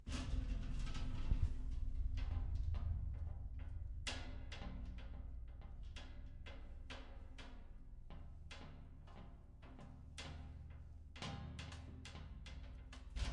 TH SFX - Subtle metal rattles and knocks 01
Playing with a noisy metal shelf to get a bunch of different sounds. Recorded with a Rode NTG3 shotgun mic into a Zoom F8 field recorder.
Rode-NTG3, Zoom-F8